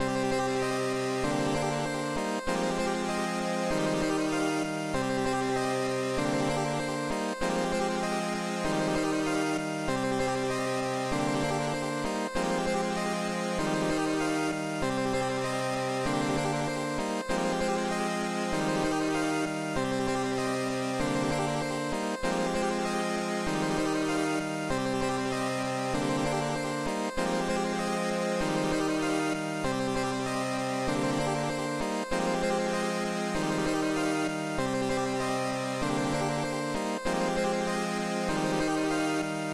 Gras und Brennnesseln
Gras, Florida, Brennesseln